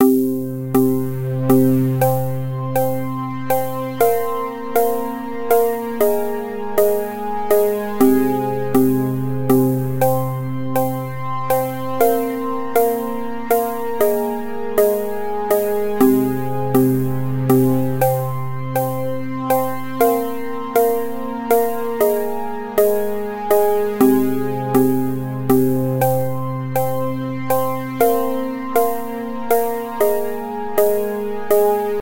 Created using ableton. 120bpm.
loop, synth, techno